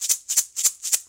Shaker Percussion Home-made